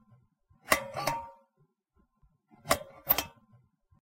Bedroom Metal Lamp Swtich
A bedroom sound effect. Part of my '101 Sound FX Collection'